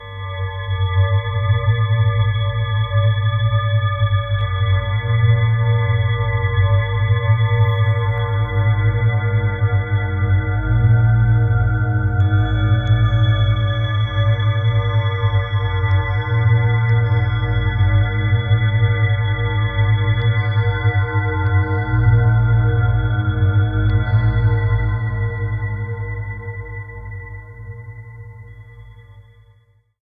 THE REAL VIRUS 06 - BELL DRONE - G#2
Drone bell sound. Ambient landscape. All done on my Virus TI. Sequencing done within Cubase 5, audio editing within Wavelab 6.
ambient, drone, multisample, bell